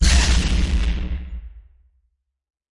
Missile Blast 3
A heedy explosion ignition sound reminiscent of a Sci-Fi thruster. I inspired this from F-ZERO GX which contained unique "turbo-boost" sounds. The samples from Missile Blast 2 were waveshaped and compressed further to generate a much more rougher noise than before.
blast, roaring, sound-fx